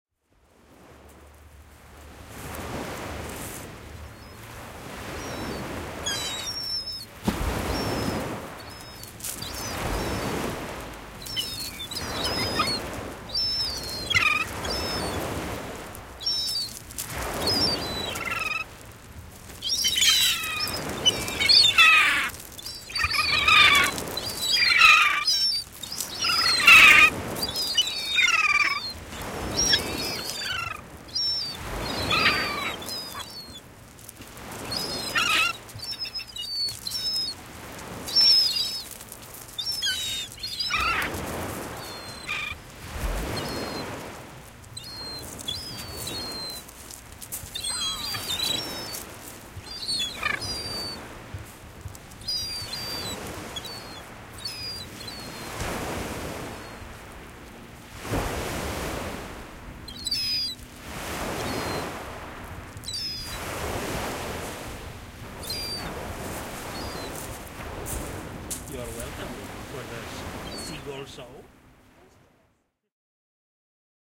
Seagull Show [Beach Sipar, Mošćenička Draga, Croatia, 2019-09-12]
#NATURE
Recording of the dancing seagulls during their dinner time.
Nagranie rozkrzyczanych mew podczas kolacji :)